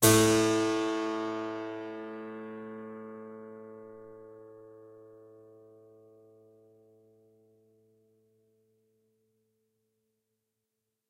Harpsichord recorded with overhead mics